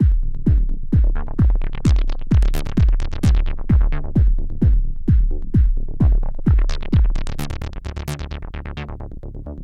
Synth Arpeggio Loop 19 - 130 bpm
Synth Arpeggio 01
Arpeggio Loop.
Created using my own VSTi plug-ins